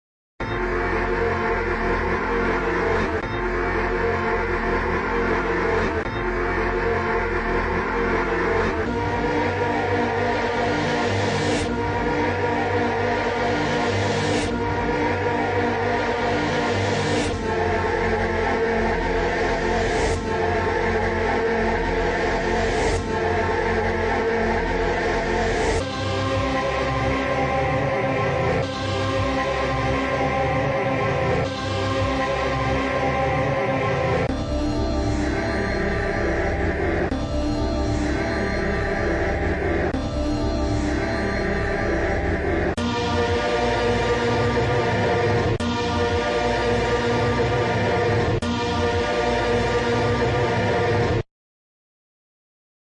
alien pad1
an experiment in mashing up some voices/machinery into a musical (ish) soundscape
experimental, sci-fi, soundscape